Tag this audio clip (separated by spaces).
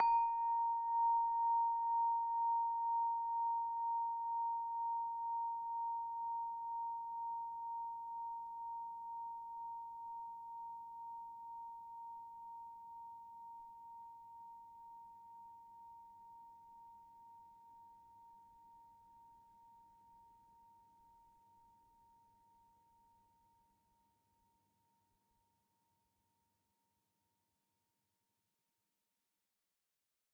crystal-harp hifi sample